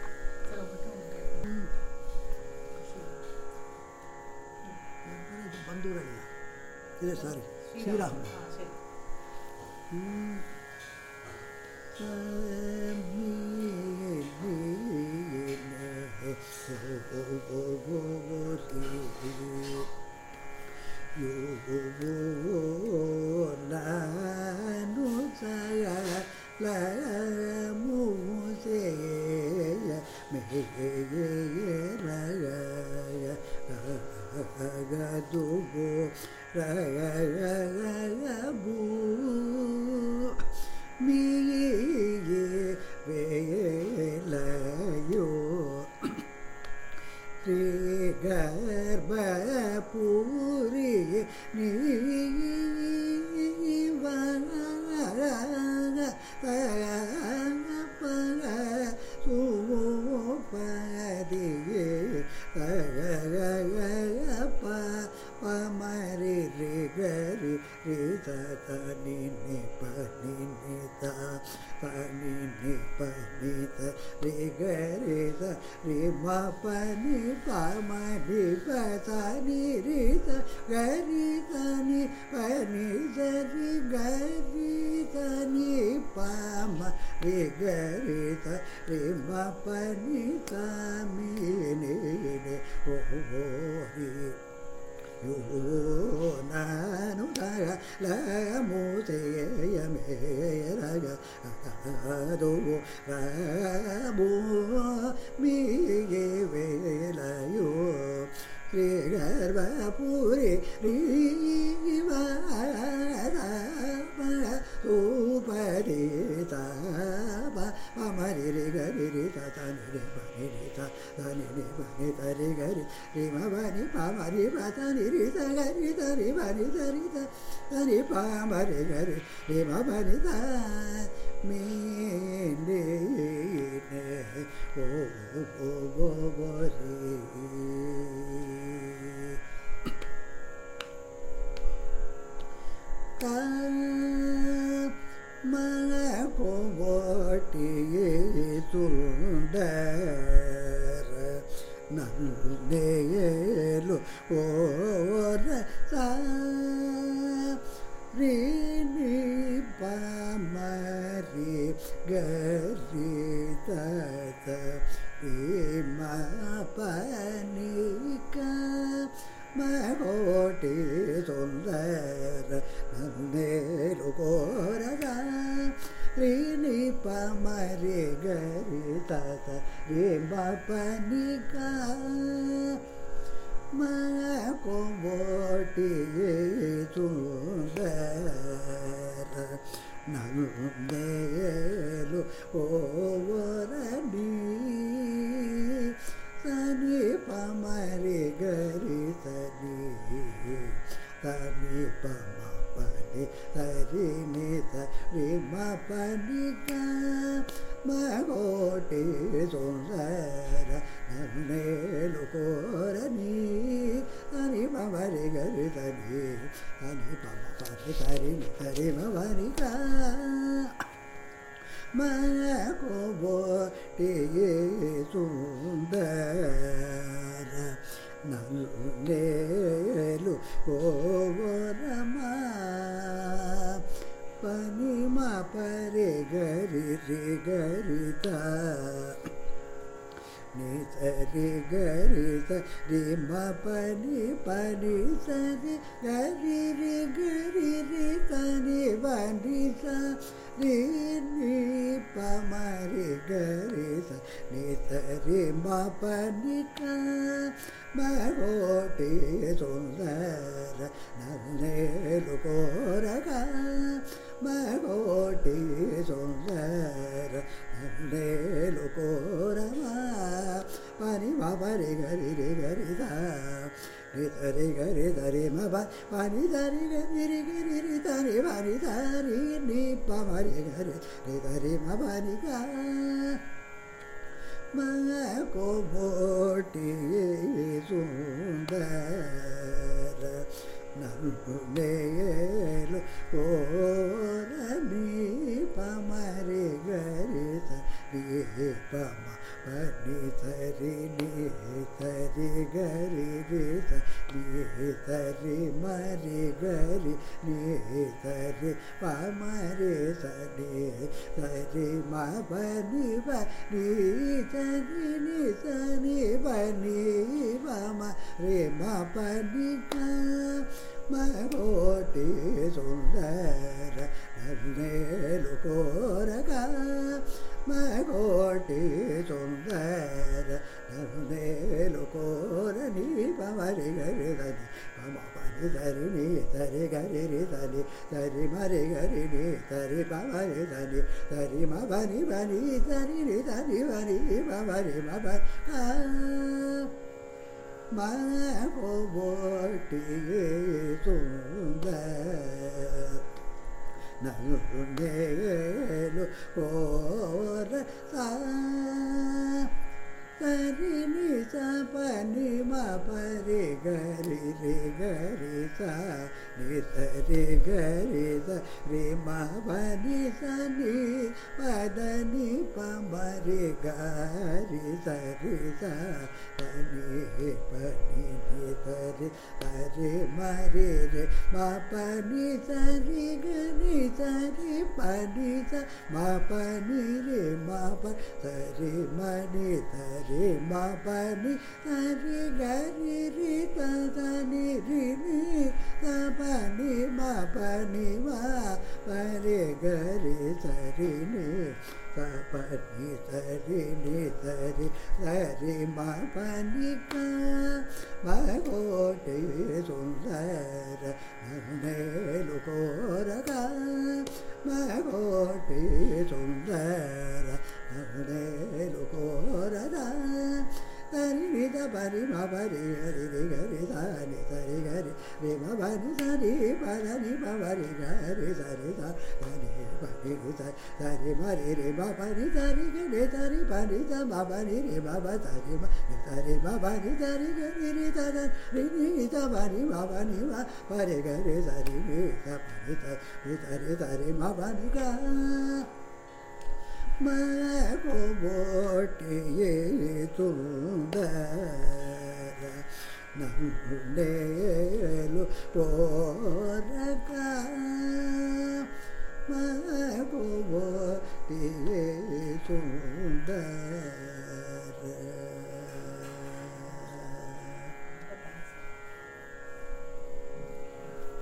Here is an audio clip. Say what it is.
Varnam is a compositional form of Carnatic music, rich in melodic nuances. This is a recording of a varnam, titled Saami Ninne Koriyunnanu, composed by Karoor Devudu Iyer in Sri raaga, set to Adi taala. It is sung by Badrinarayanan, a young Carnatic vocalist from Chennai, India.